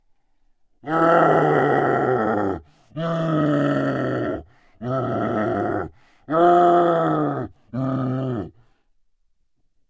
Monster Rawr
Pitch shifted recording of a friend of mine yelling in the studio randomly